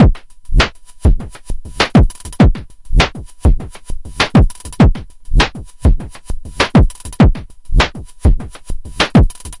Drums loop Massive Groove 100BPM-01
loop, groove, massive, drums, 100bpm